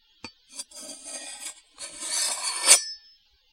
Slow-Slide
A slower sliding metallic noise made with a meat cleaver on a plastic cutting board.
Super fun to make.
slow, sharpen, cleaver, knife, utensil, steel, slide, clutter, meat, bread, scratch